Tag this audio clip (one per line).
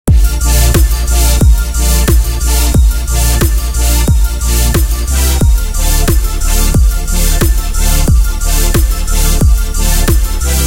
electronic
100BPM
synth
Future-bass
music
dance
D
edm
loop
key-of-D